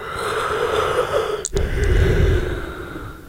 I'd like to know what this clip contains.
Another yawn from staying up too late doing silly stuff like this.
yawn, body, sound, human